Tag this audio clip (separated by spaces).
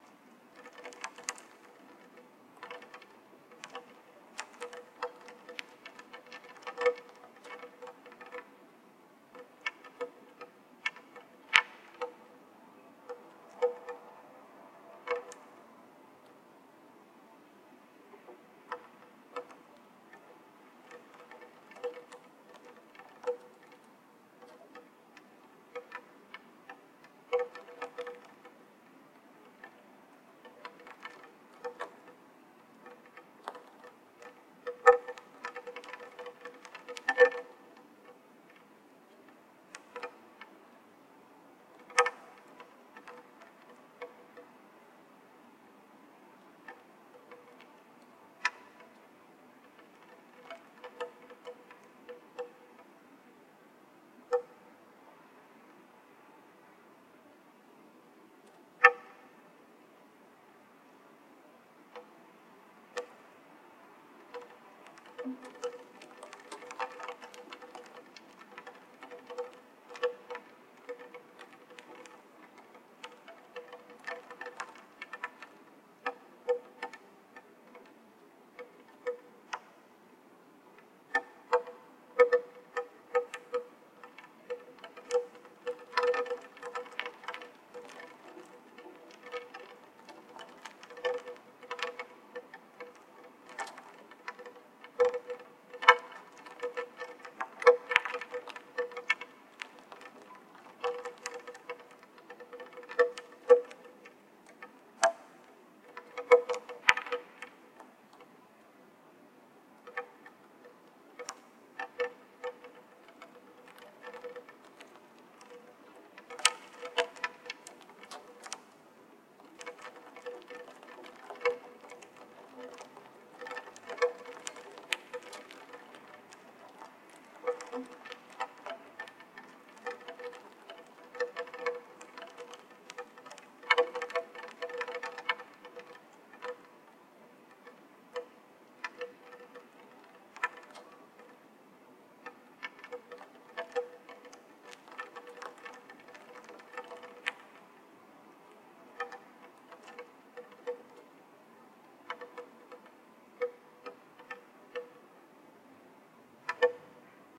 effect field-recording fx processed raindrops wet